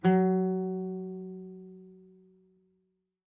F#3 Guitar Acoustic Mediator
F#3 played on an acoustic guitar with a mediator for the right hand and no left hand technique.
Recorded with a Zoom h2n
guitar, mediator, single-notes, nylon-guitar, oneshot, acoustic, asp-course